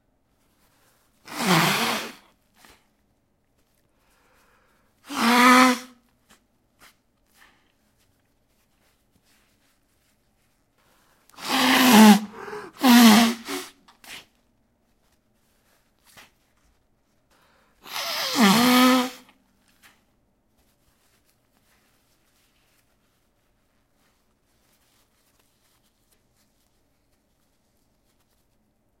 Nose Blowing
Blowing my nose.
Recorded with Zoom H2. Edited with Audacity.
depressed, handkerchief, mucus, nasal, sad, snot, virus